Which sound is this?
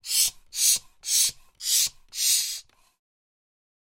Bicycle Pump - Plastic - Repetitive 01
A bicycle pump recorded with a Zoom H6 and a Beyerdynamic MC740.
Gas, Pressure, Pump, Valve